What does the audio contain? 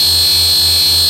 industrial scape
A Casio CZ-101, abused to produce interesting sounding sounds and noises